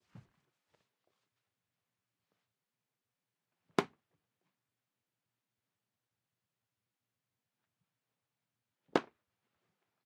hit with a book